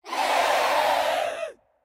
cell screams 8
Short processed samples of screams
air, breath, effect, fx, horror, human, monster, monsters, processed, scream, screams, vocal